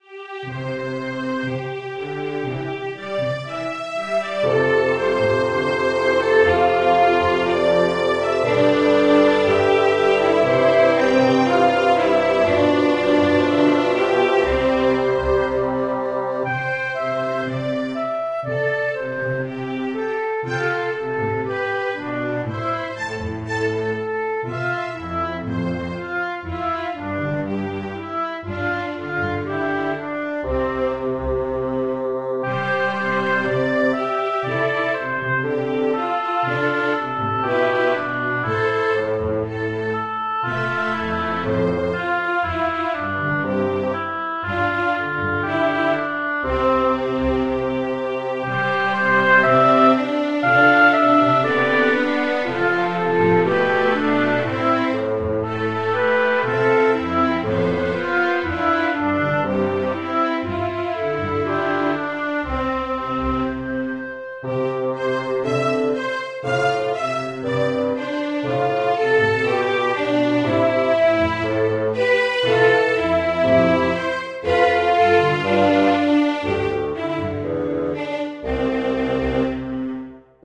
This is one of the musical motifs that I composed on the theme of fairy tales of the Magic Land. This is just a musical score, I used the standard MuseScore3 soundbank to play notes. If you are interested, in my free time I can work on a complete music track, independent projects are welcome. To do this, just send me a message.